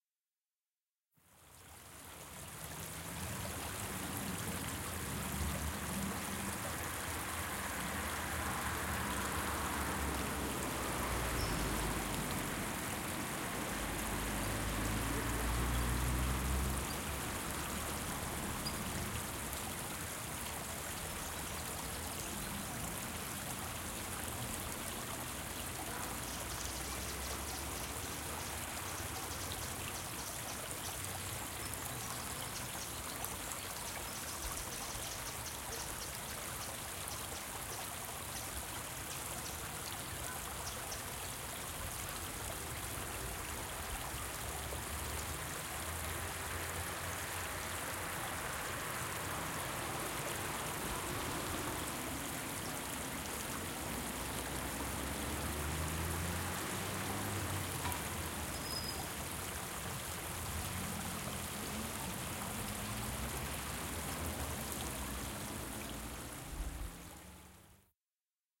Zoom H4n X/Y stereo field-recording in Zeist, the Netherlands. General ambiance of park, residential.